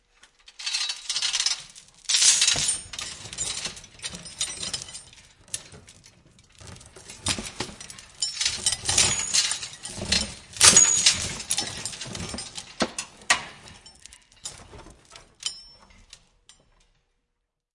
metal chains and box movement banging
banging, box, chains, metal, movement